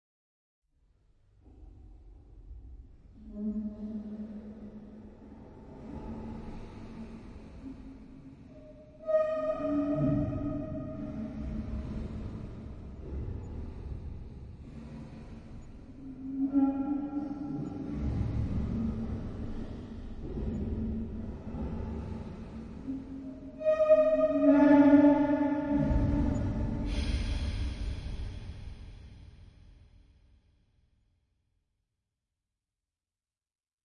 closing squeaking drawer with reverb fx chain